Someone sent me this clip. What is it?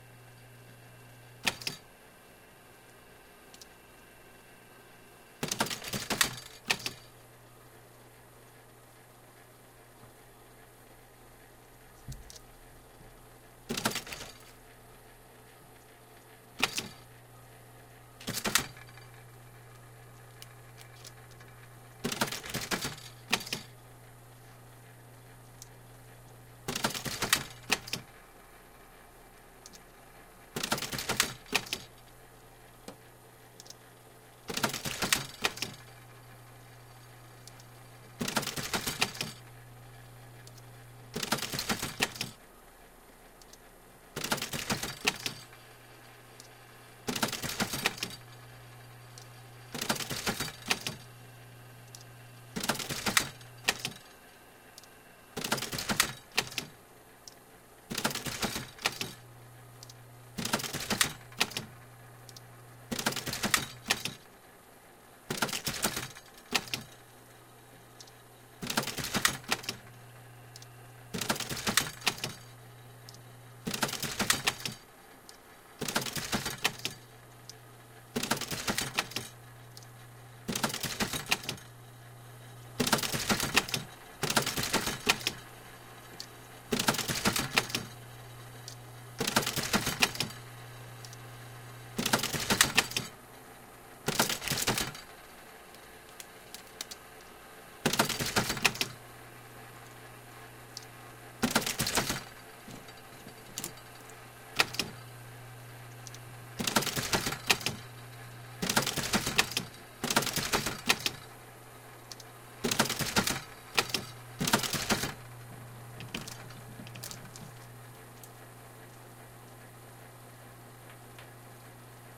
slide mounting machine
An operator runs a machine that first cuts a strip of film and then closes the slide frame around the film and spits it out. As the recording goes on the operator is able to go faster.
whirr, bluemoon, sample, machine, photography, camera, shutter, click, foley, historic, raw, sound-museum